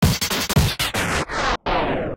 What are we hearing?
Pump 5 Slow Down
k, love, l, pink, h, glitchbreak, y, t, deathcore, e, processed, o, fuzzy